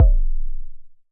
Just some hand-made analog modular kick drums
Kick, Modular, Synth, Analog, Recording